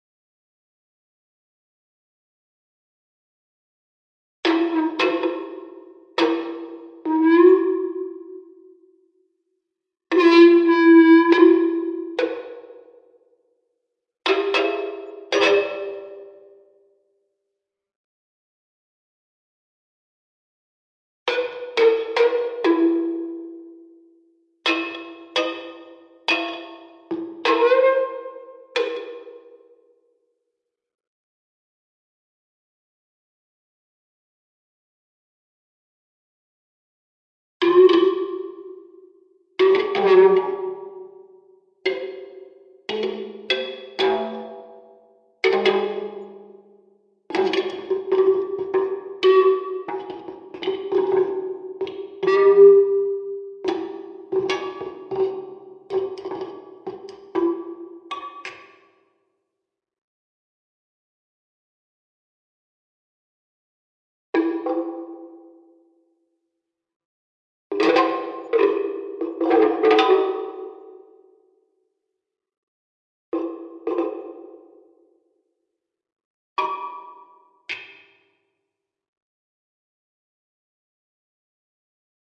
Sound was picked up by a contact mic clamped to the plastic box and put through a small amplifier.Mostly plucked sounds, but also some oddities in there where I have used pens or similar objects to scrape, and hit the elastic.
acoustic, contact-mic, contact-microphone, elastic, experimental, plastic, plucked, resonance, rubber-band, strange, wierd